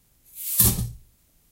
Sand being thrown into a metal pot
metal, impact, sand